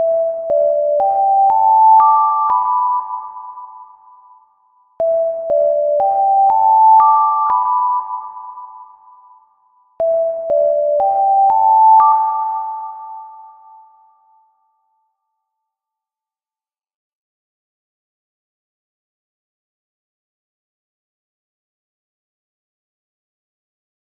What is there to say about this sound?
TAI cay saati alarm normal reverb
Tea spoon recorded with a cheap headset mic. So it has a background noise. The alarm produced using sine wave+reverb.
This one has mid level reverb.